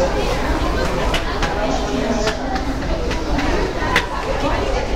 A case bouncing as it's pulled down the stairs.
This sample is
part of a set of field recordings made around Montreal Trudeau airport
in summer 2006, various sounds and voices make themselves heard as I
walk through to the check-in.
percussion, field-recording, montreal, airport, canada